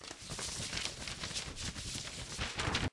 ruffling papers
Ruffling some papers